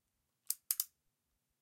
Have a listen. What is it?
Gun cocking 2
Cocking a revolver. recorded with a Roland R-05
cocking, revolver, cock